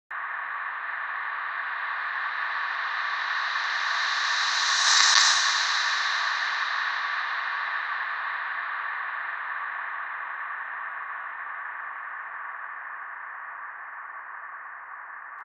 reversed women
effect, fx, riser, sound, sound-effect, soundeffect